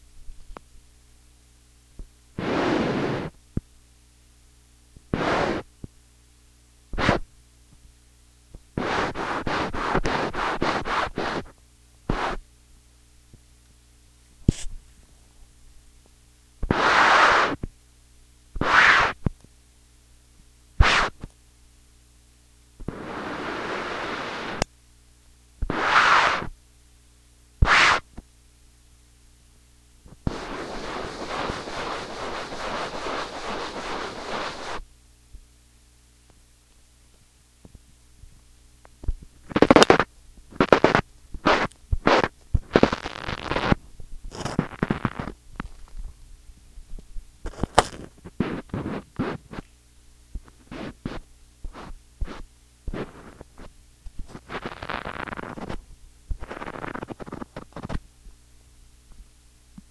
touching a paper
ambient, perception